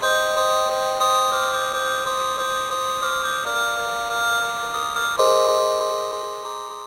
Micron Hi Tone 1
Alesis Micron Stuff, The Hi Tones are Kewl.